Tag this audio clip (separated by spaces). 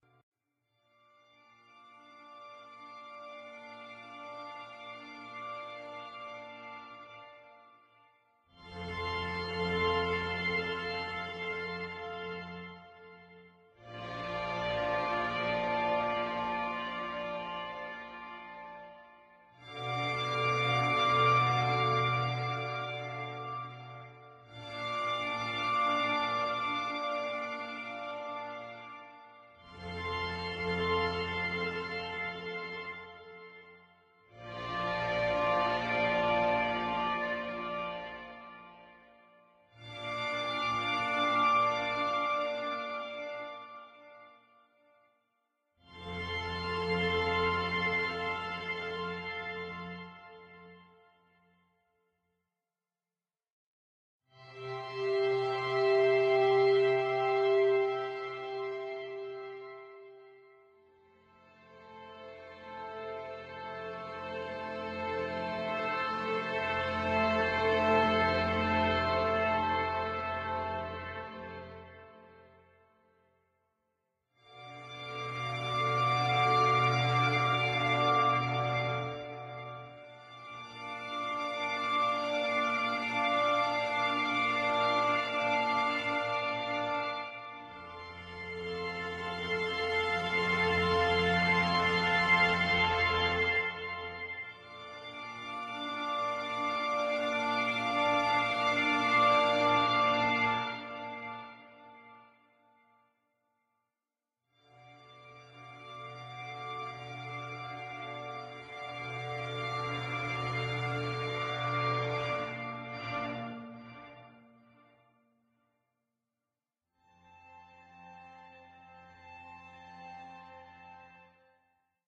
Cinematic Longing Lonley Lost wishfull